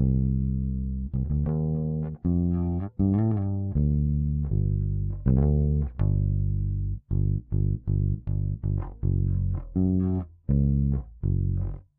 Dark loops 008 melody bass dry 80 bpm
80bpm, bass, bpm, dark, loops, piano